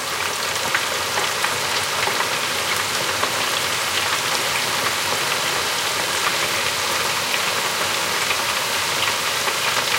the sound of sliced potatoes being fried in olive oil. Sennheiser MKH60 + MKH30, Shure FP24 preamp, Edirol r09 recorder. Decoded to mid-side stereo with Voxengo VST free plugin
cooking, french-fries, fried, kitchen, oil, potatoes